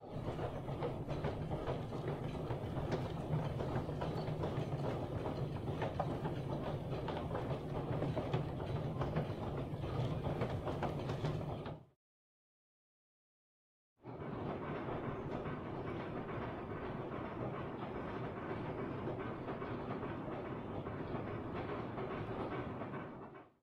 Two recordings of different moving stairs.